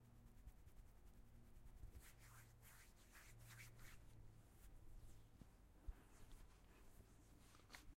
Rubbing on foundation, between hands and on face.